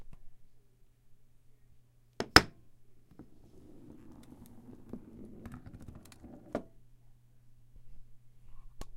FLASHLIGHT ROLL
A flashlight dropping and rolling on a hardwood floor.
break, drop, flashlight, rolling